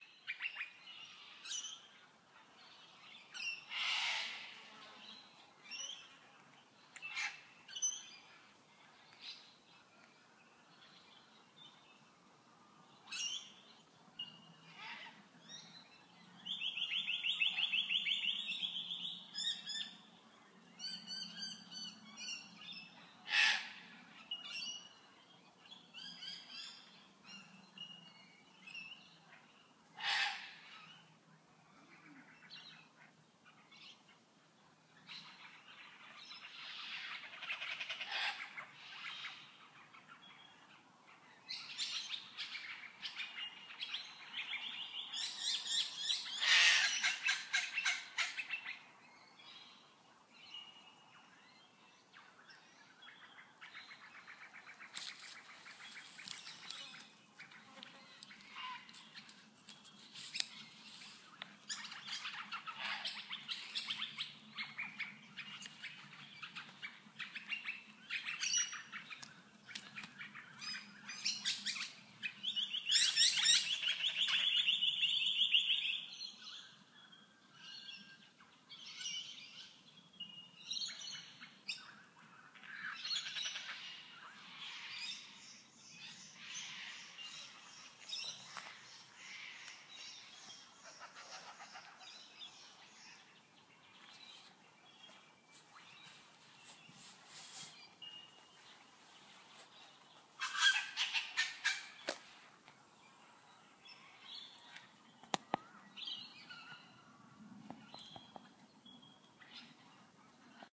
A sound of bird tweets and chirps.